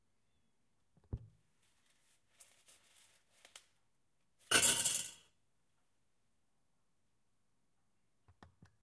PASTA SPAGHETTI IN PENTOLA
classica scena intaliana dove si gettano gli spaghetti in pentola